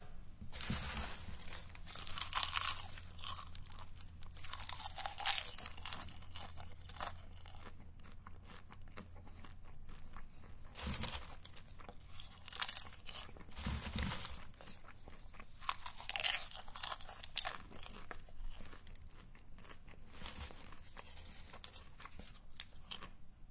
Scooping and greedily eating hand-fulls of popped corn. Recording chain: AT3032 stereo mics - Edirol R44 digital recorder.